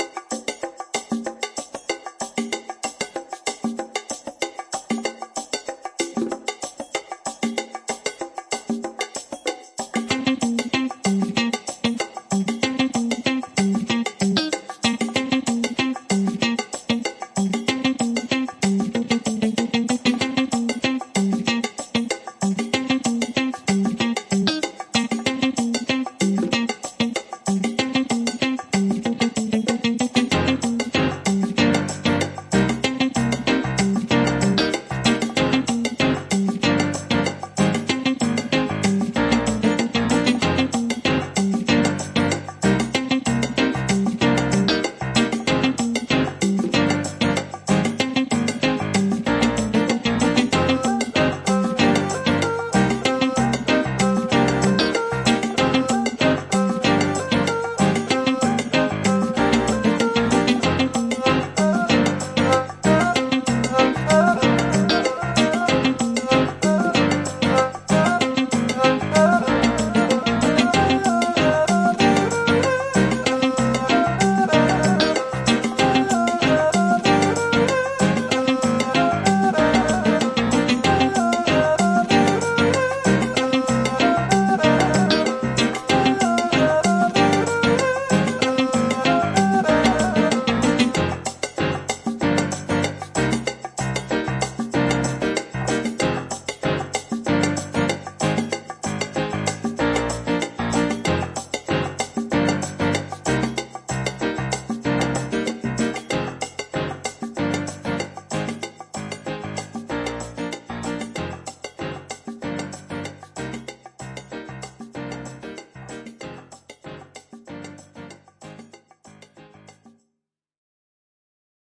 East and West music collision
asian, chinese, cinematic, guitar, instruments, music, oriental, piano, soundtrack, west